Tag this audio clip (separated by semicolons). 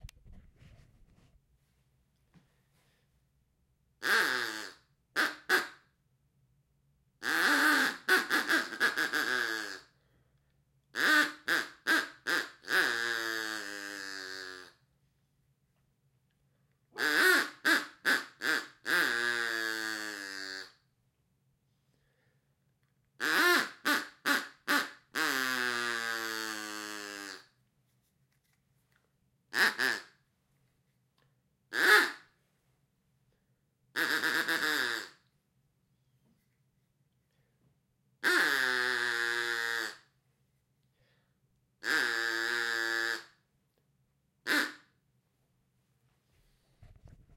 duck; Duck-call